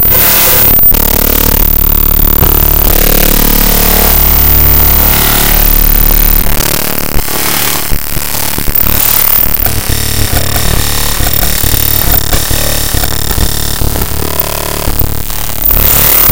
Computer Glitch 4
This sound was made by importing a picture into Audacity. Nothing more needs to be said. Made with Audacity.